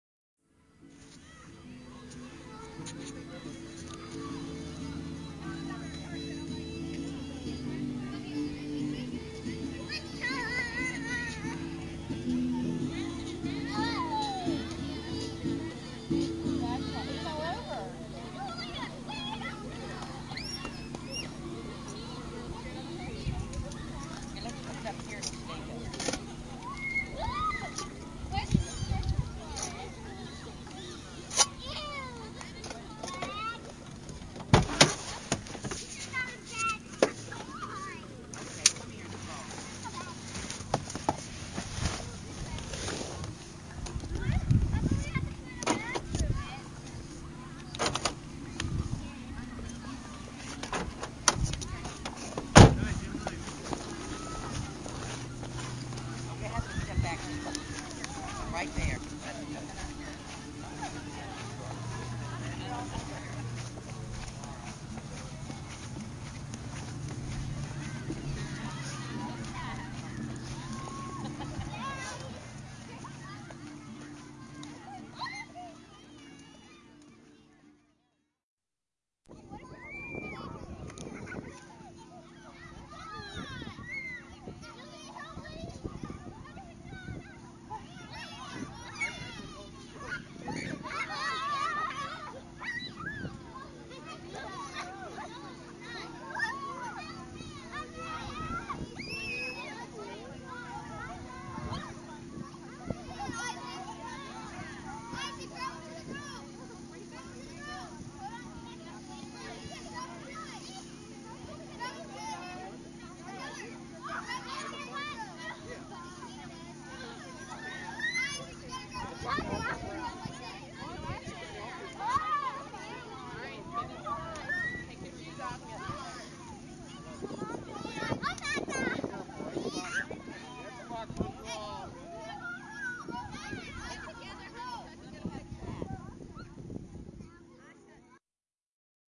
WalkingThroughHarvestFest LighthouseAcadamy Oct2011
A recording of walking around an outdoor party at my brother's school. Some great sounds of kids running, laughing, and yelling, there's a guitar playing in the background at the beginning and you can hear some car door noises around the middle. There's a bit of wind noise at times and you can occasionally hear me breathing or walking recorded with my phone.
children, fun, field-recording, car-door, ambient, guitar, outdoors, laughter